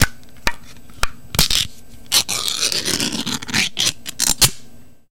Spam can opened 2 (long)
Sound of metal Spam can being opened. Similar to the sound of a can of sardines being opened.